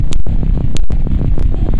Crunch2LP
noisey 1-bar rhythmic loop made in Native Instruments Reaktor
1-bar, dark, electronic, industrial, loop, noise, noisy, rhythmic